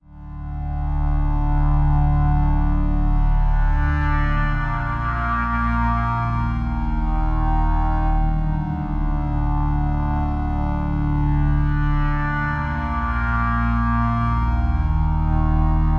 Tension 4/4 120bpm
This is a synth background element I used in a song recently, uploading it here for others to use. 2 bars at 4/4 120bpm (16s) - It loops twice. The whole sample is loopable.
ambient, chord-progression, electronic, loop, loopable, sample, synth